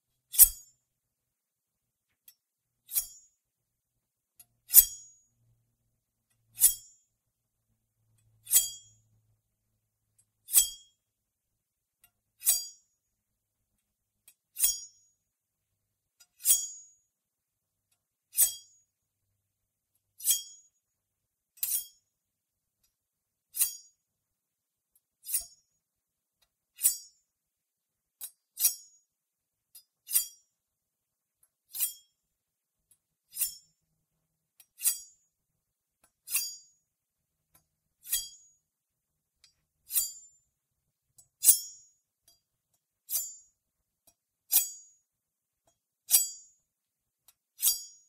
The sound of a blade being pulled from a sheath or along a hard surface
blade, knife, pull, sheath, sword